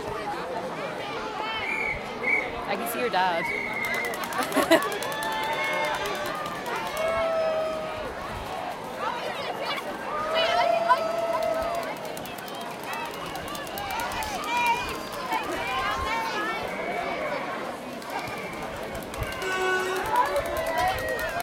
Generic Crowd Noise
A short clip of some ambient sound noise captured during a parade in a town in Dublin on St. Patrick's Day 2016
ambient, chat, crowd, field-recording, parade